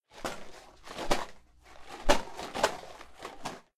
supposedly a thunder sound